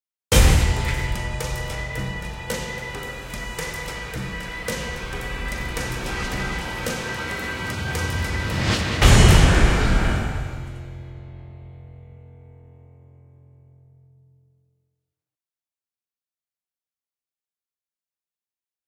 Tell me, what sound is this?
Trailer end 1
trailer-music, epic-music, free-trailer-music, game-music, trailer-end, film, film-music